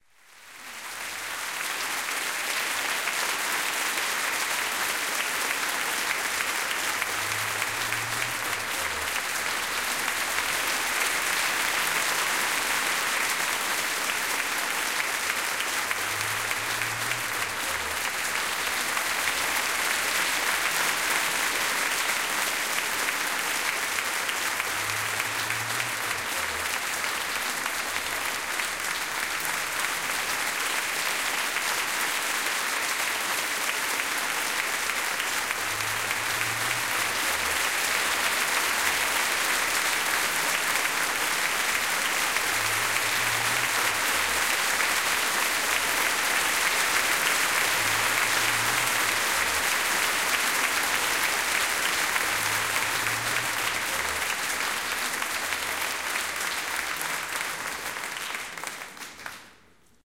This is a recording of an audience clapping at the end of a school event.
audience, event, school